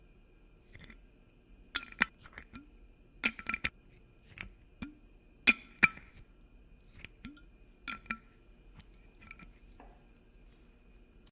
Hitting a glass bottle with drum sticks. This is the manipulated file.